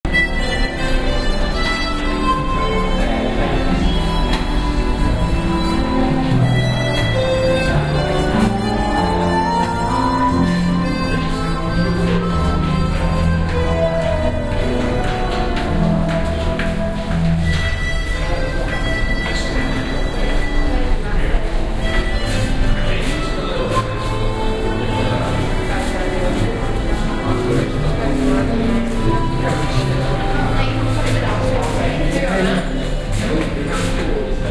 Westminster - Busker in station
london, department, ambiance, field-recording, ambience, store, binaural